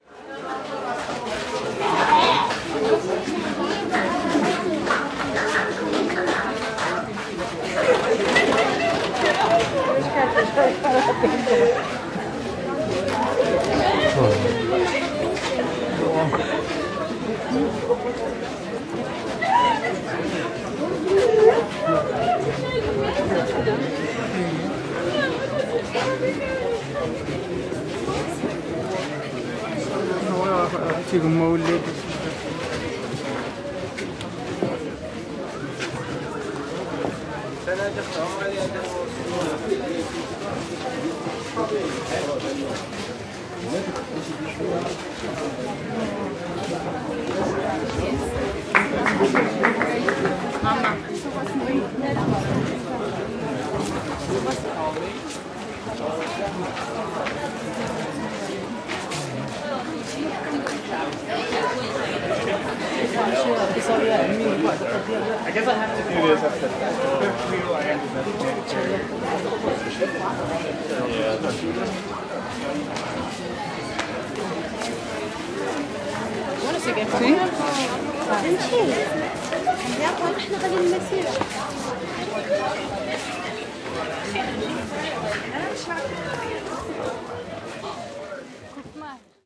Souks Marrakesch 3
Atmosphere walking through she "Souks", the biggest market on the african kontinent.